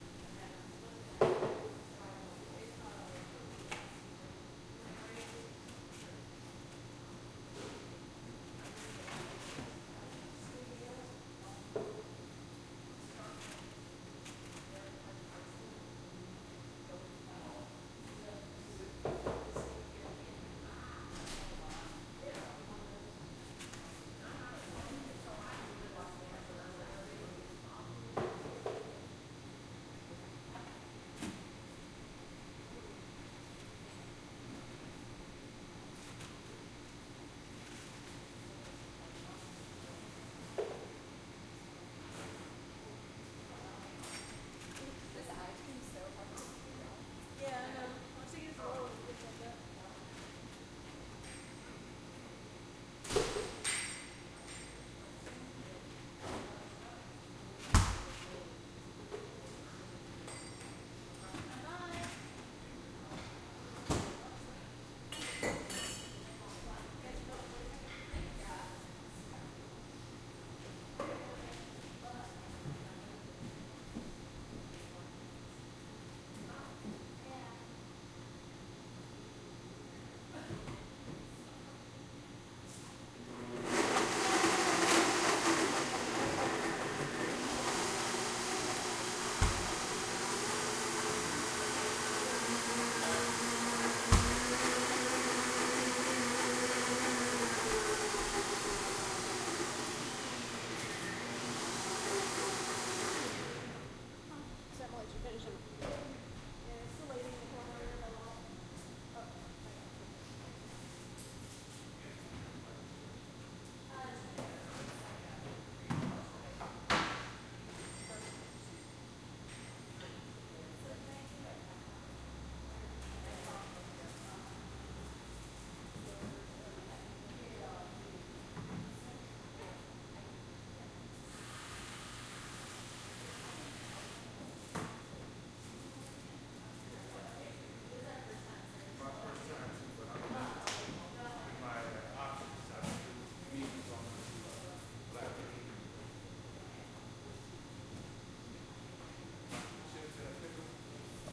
MS-STEREO Coffee Shop, 6 People, low chatter, refrigerator, barista making milk shake, customers entering 3
ambient, MS, 6, soundscape, 2, barista, chatter, STEREO, Shop, entering, customers, low, ambience, People, Coffee, noise, field-recording, movement, refrigerator, atmosphere